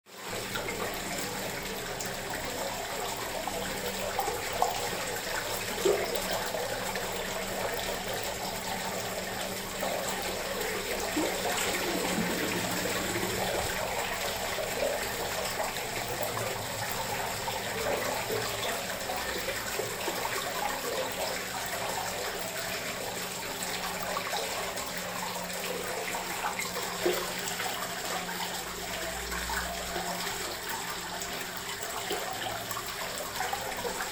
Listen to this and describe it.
fountain water cave
This is a fountain sound recorded inside a little cave in Spain.
Please post links to your work here in comments, would be interesting to see where you've used it. Thanks and have fun!